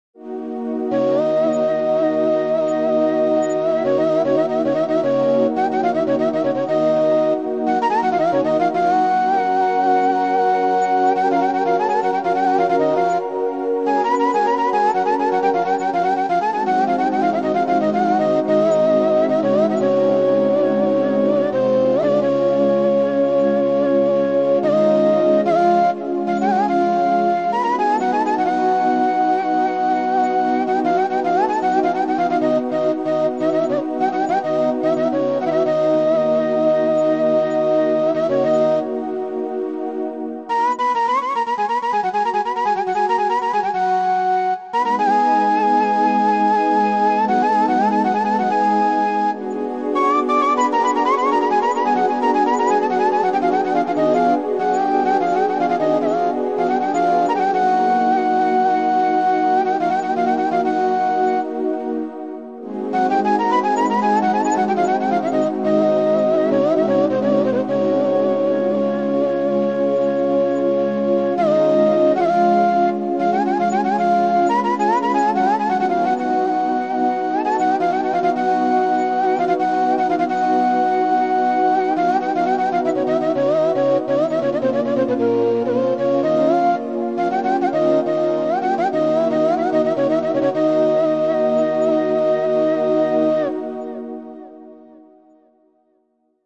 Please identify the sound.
Balkan Kaval solo
Acoustic, balkan, Flute, instrument, Instruments, Kaval, Macedonian, woodwind